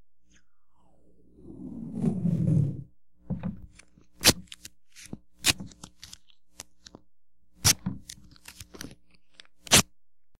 tape02-wide painters tape#1
Wide painter's tape (blue, 2" tape) being stretched and then torn into pieces.
All samples in this set were recorded on a hollow, injection-molded, plastic table, which periodically adds a hollow thump if the roll of tape is dropped. Noise reduction applied to remove systemic hum, which leaves some artifacts if amplified greatly. Some samples are normalized to -0.5 dB, while others are not.
painter, sticky, stretch, tape, tear